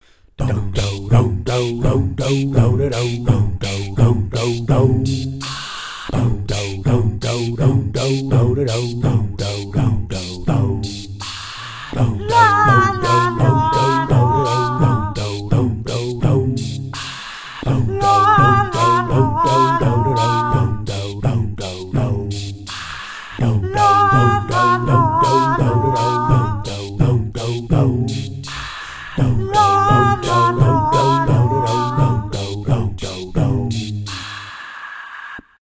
A silly tune, comprised solely of vocals